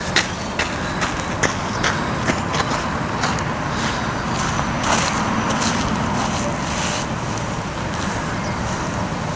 Camera Walking (Gravel) 02
Walking on gravel.